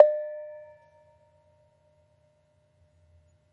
BONANG BPSL2
CASA DA MÚSICA's VIRTUAL GAMELAN
The Casa da Música's Javanese Gamelan aggregates more than 250 sounds recorded from its various parts: Bonang, Gambang, Gender, Kenong, Saron, Kethuk, Kempyang, Gongs and Drums.
This virtual Gamelan is composed by three multi-instrument sections:
a) Instruments in Pelog scale
b) Instruments in Slendro scale
c) Gongs and Drums
Instruments in the Gamelan
The Casa da Música's Javanese Gamelan is composed by different instrument families:
1. Keys
GENDER (thin bronze bars) Penerus (small)
Barung (medium) Slenthem (big)
GAMBANG (wooden bars)
SARON (thick bronze bars) Peking (small)
Barung (medium) Demung (big)
2. Gongs
Laid Gongs BONANG
Penerus (small)
Barung (medium) KENONG
KETHUK KEMPYANG
Hanged Gongs AGENG
SUWUKAN KEMPUL
3. Drums
KENDHANG KETIPUNG (small)
KENDHANG CIBLON (medium)
KENDHANG GENDHING (big)
Tuning
The Casa da Música's Javanese Gamelan has two sets, one for each scale: Pelog and Slendro.
Gamelan, digitopia, sica, Digit, Casa-da-m, pia, porto, o, Java